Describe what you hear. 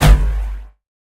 Energy Bounce 1
A part of the Energy Riser 1 but the hit itself.
The base sound effect I believe may have been simply put, the sound of a plastic bin lid being slammed fairly hard. Due to the dull but fast attack and release of the "transients" of the sound, filtering and phaser effects tend to work better on these types of sounds as a base.
bass, bounce, deep, Energy, fx, hit, quaking, sci-fi, sfx, short, sound-design, sound-effect, wobbly